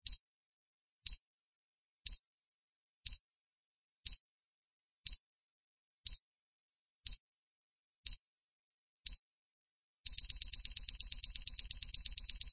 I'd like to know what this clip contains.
alarm timer watch countdown
A digital countdown from a watch. Beep beep beep...
alarm, clock, tick, time, timer, watch